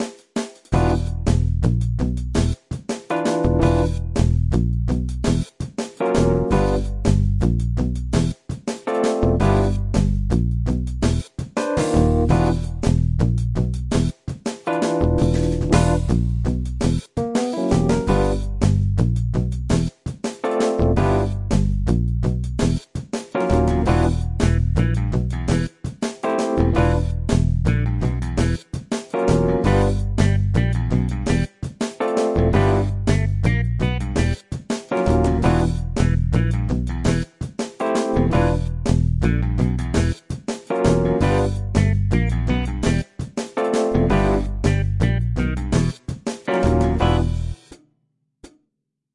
Funky Groove
Do that stank face!
Although, I'm always interested in hearing new projects using this sample!
beat, cartoon, drums, funk, funky, groove, guitar, jazz, loop, organ, stank, tight, walk